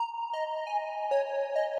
ambient naturept2 squarepurity 1 135bpm
Melody loop with small reverb
Melody, Chill, Trance